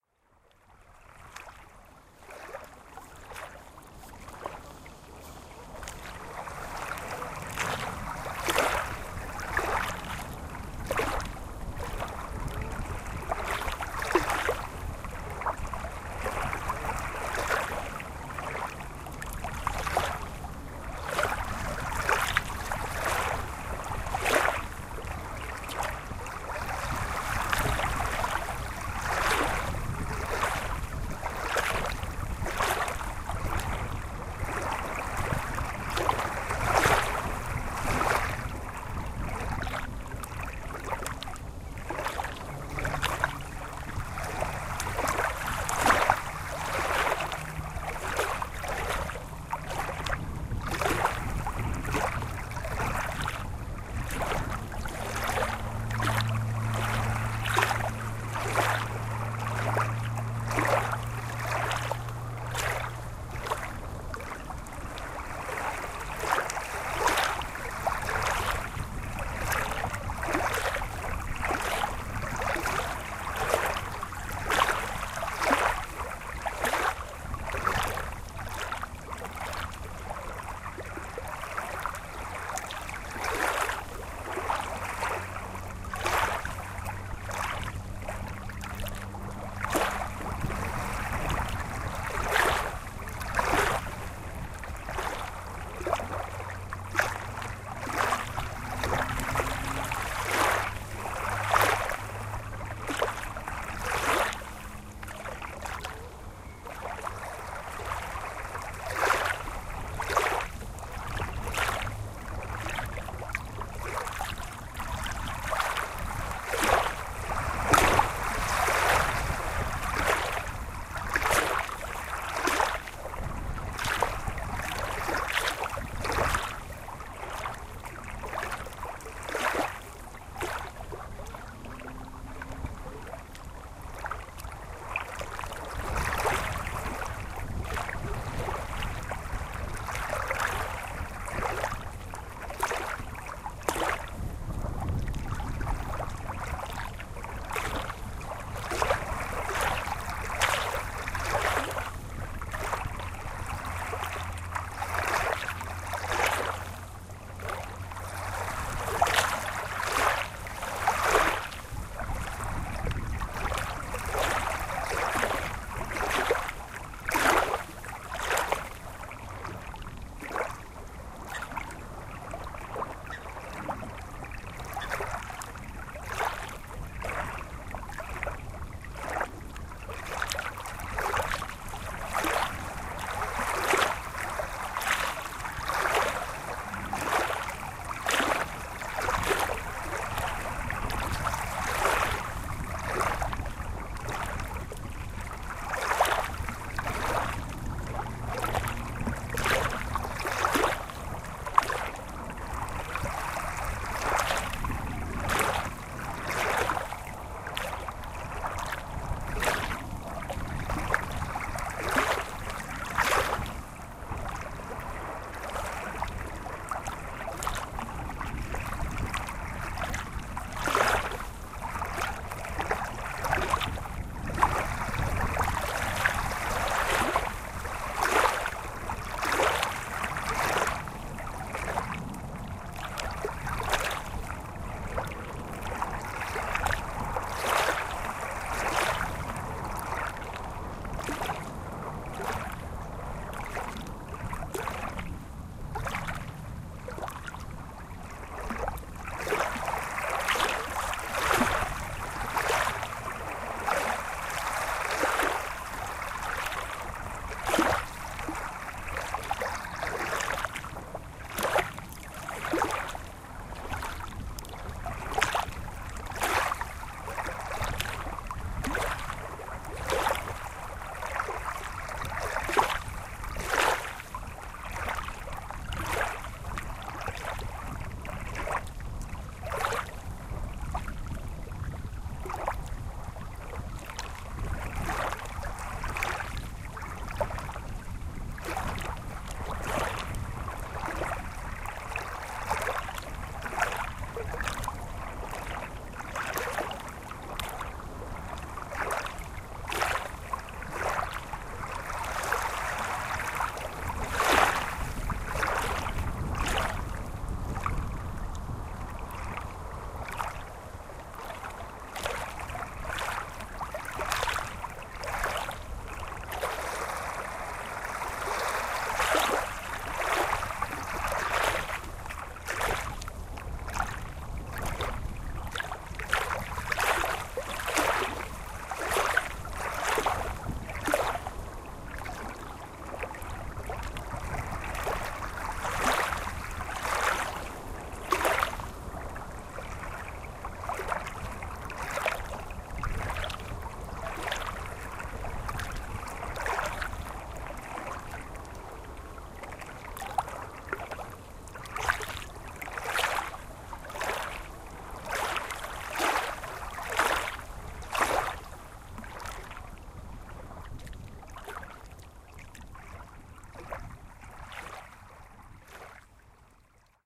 Lake water sound
A recording made at Pamvotis lake Ioannina Northwestern Greece.
Greece, waves, water, Pamvotida, nature, field-recording, Lake, Ioannina, Epirus, Pamvotis